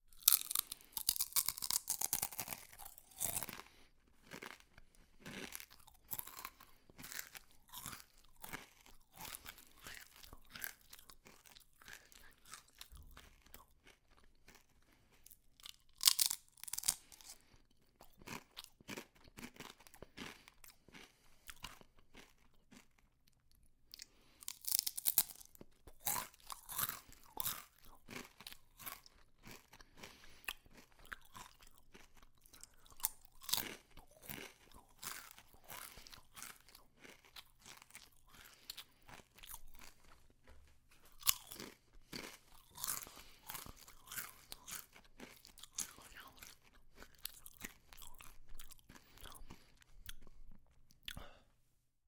Human Chewing Chips
A closeup recording of myself eating a super tasty bag of chips. Om nom.
chewing, chip, chips, eat, eating, food, gross, human, mouth, mush